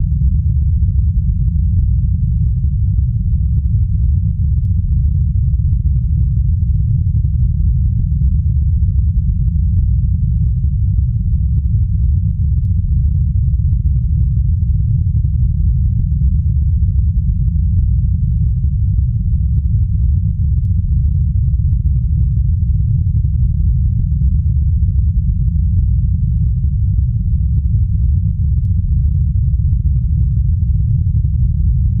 cave echo
Click "Buy album" and put "0" as the price.
creepy, cave, horror